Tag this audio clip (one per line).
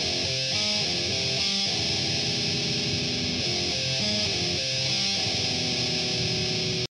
guitar
groove
rock
thrash